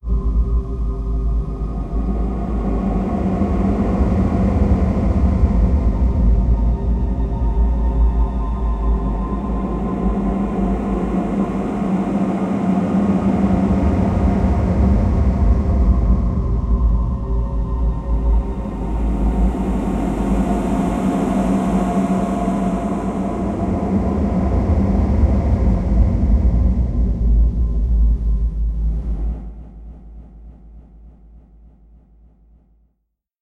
My first little attempt at making some film-like sound effects. alot of bass in this one, tried to cut it below 30-50Hz.
I just want to point out to the people having a listen, that the sample that streams on the website has a small static-like noise in it.. The download version will not have this noise, I believe the compression for streaming degraded the sound a bit.
electronic, sound-effect, processed, horror, ambient, reverb, echo, sound-design, atmosphere, effect, soundscape, voice, technique, long-reverb-tail, pad, dark, drone, ambience, noise, vocal, breath, experimental, deep, bass, delay, thunder, ambiance, sci-fi, soundeffect, fx